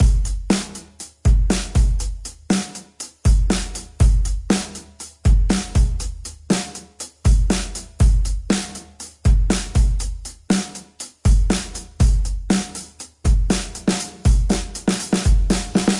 120 Rock Drums
120bpm Rock Drum Loop
120, drum, drumloop, rock